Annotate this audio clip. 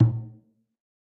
Clean Malaysian frame drum hits from my own collection.